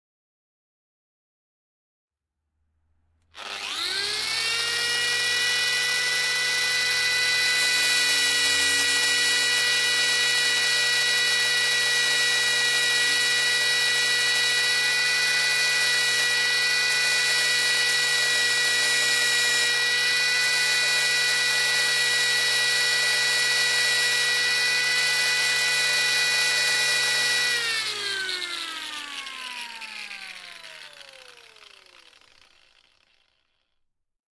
Turning on and off an angle grinder tool and grinding a piece of wood. Made in a small workshop. The sound was recorded in 2019 on Edirol R-44 with Rode NTG-2. Adobe Audition was used for postproduction.
angle-grinder-tool, CZ, Czech, field-recording, grinding, machine, Panska, tool, workshop